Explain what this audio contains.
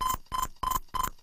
Pace Maker Loop III
Rhythmic glitch loop.
squeaky, glitch, idm, strange, circuit, bending, circuitry, noise, tweak, sleep-drone, bent